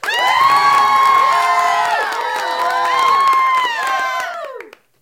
Small crowd cheering like at the beginning of a show